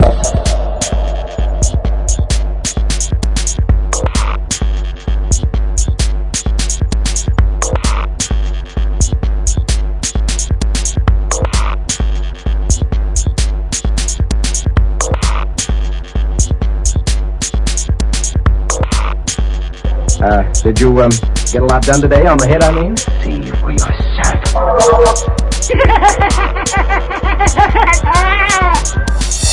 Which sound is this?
The Head 130bpm 16 Bars
Beat loop with samples. Check your head!
Beats, loops, mixes, samples